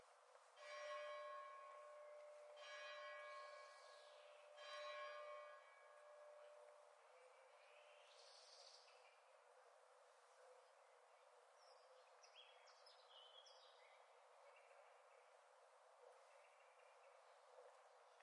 SE BELLS three times with some birds Olomuc
atmos, atmosphere, bells